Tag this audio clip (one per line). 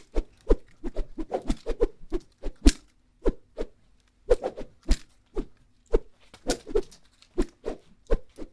whooshes
high